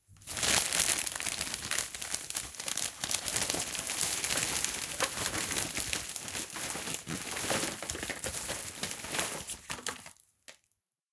Bag of Trash
Handling a plastic bin bag filled with mixed waste including plastic bottles and wrapping. Recorded with Voice Recorder Pro on a Samsung Galaxy S8 smartphone and edited in Adobe Audition.
bag, wrapping, bin, plastic, recycle